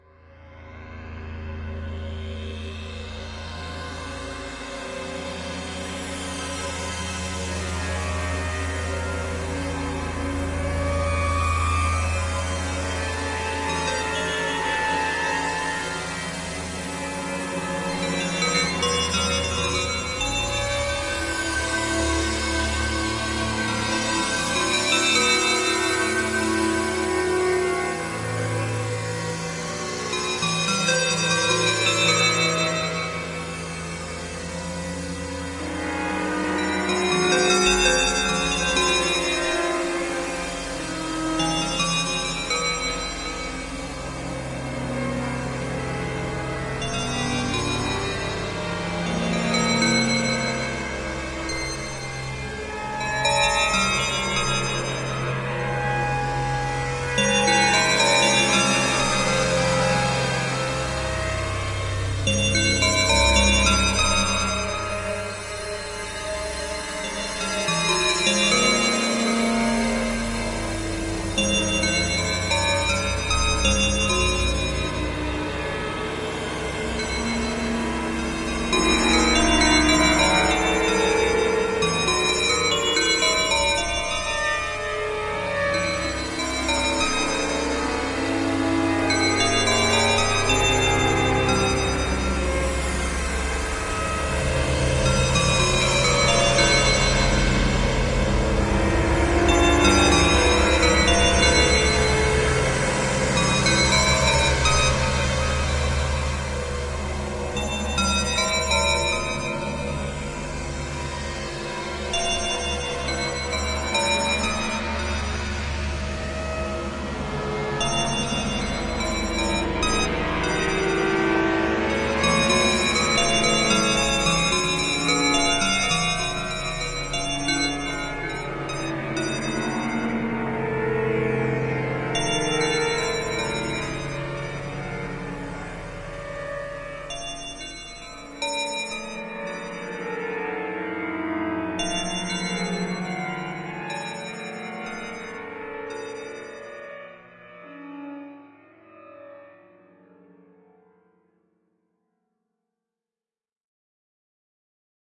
Several sounds generated in Camel Audio's software synthesizer Alchemy, mixed and recorded to disc in Logic and processed in BIAS Peak.
bell, processed, resonant, soundscape